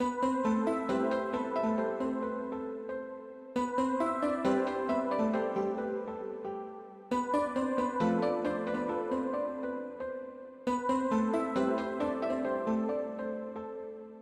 celestial piano
This is a short loop i made for my new song, i used nexus for the 2 piano sounds. Delay effect was achieved by playing same notes with a certain offset in starting time.
130, bpm, flstudio, loop